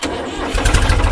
Diesel engine Startup
tractor diesel startup, recorded on a Zoom H4n
startup, engine, diesel